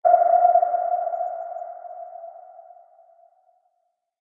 Simulated sound of a sonar ping, as heard by the hunted. Made from the bird sound as tagged by the remix flag (see above)...really :)
deep-water, depth, depth-sounder, effect, environmental-sounds-research, foley, fx, ping, radar, sonar, sounder, submarine, u-boat, under-water